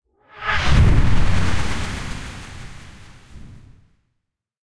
Swoosh Explosion
An exploding swoosh sound effect